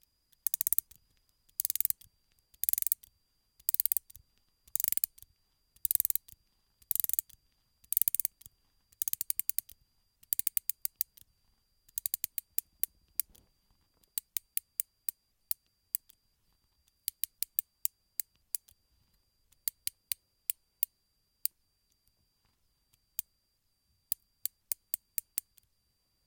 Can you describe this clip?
Wind-up/Tightening
Winding up/tightening of a clockwork mechanism. Made from some sort of clockwork thingy that I found ;)
HELP!:
You can hear in the background some sort of high pitch sound that keeps going on and off. I've had this problem for a while now.
I'm using a Tascam DR-40 field recorder with a røde NTG-1 shotgun mic.
UPDATE!:
(Only in post though, still don't know how to prevent it when recording) So if you want a version of this sound without the high pitch noise, Timbre fixed it and uploaded it to his page as a 'remix' of mine ;)